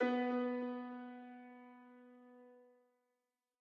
Piano B Octave Chord
These sounds are samples taken from our 'Music Based on Final Fantasy' album which will be released on 25th April 2017.
Chord, Music-Based-on-Final-Fantasy, Piano, Sample